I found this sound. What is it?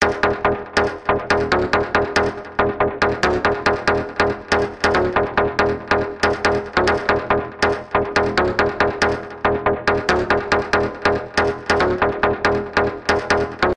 bass loop 140bpm
bass, buzz, electro, loop, riff, synth